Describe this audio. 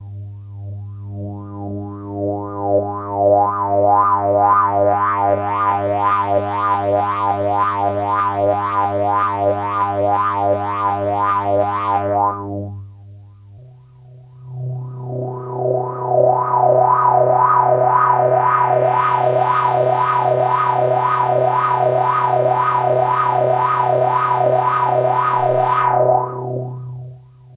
This is two fast filter sweep sounds using WASP filter and an analog bit crusher. One at note C2 and the other at B2 (sort of).